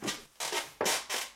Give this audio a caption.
lever chaise7
standing from a wood chair